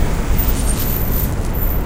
City Noise
city, field-recording, new-york, nyc, public, sidewalk